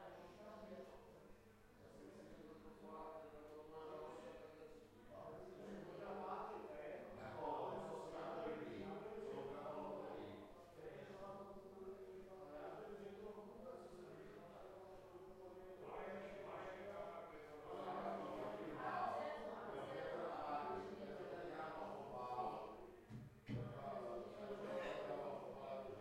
Pub(short)
Recording of a pub with people chatting.
bar, chatting, people, pub, talking, tavern, voices